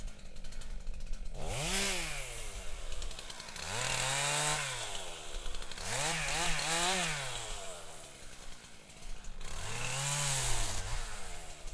starting chainsaw 2
starting a chainsaw